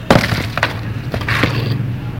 So there's a slightly back story here. So I was visiting a blank lot super frustrated and I picked up a brick from a reserved pile and smashed it onto the ground. Hopefully nobody even noticed.